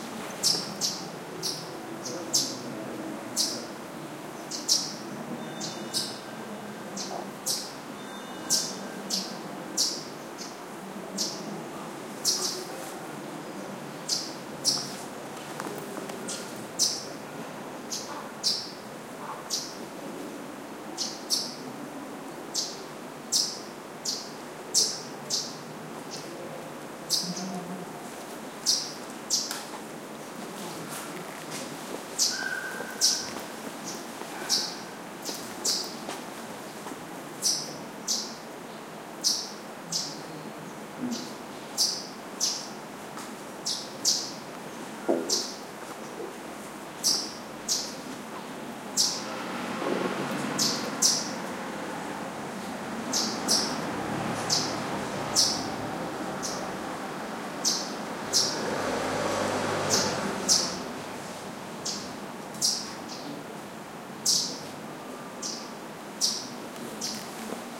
20110803 crag.martins
Chirpings from a Crag Martin. Recorded in Valvanera Monastery (near Anguiano, Spain) using PCM M10 with internal mics
field-recording, chirp